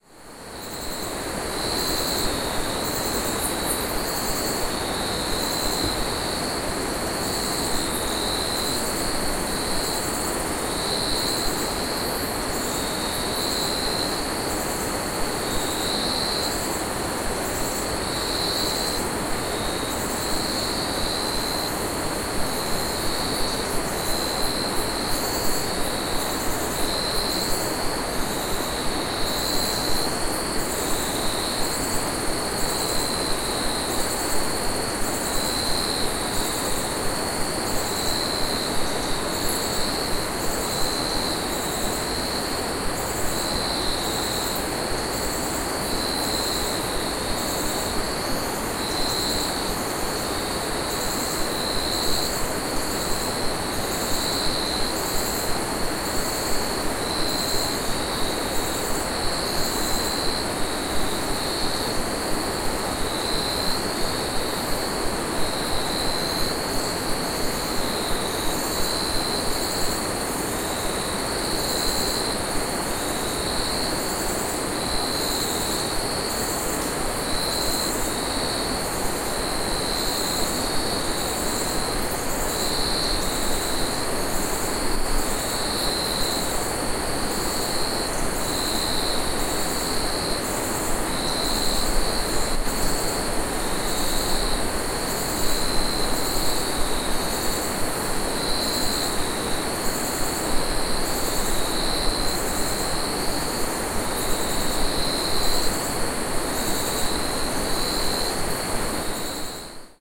Rivers and crickets in Chinese town (Songpan)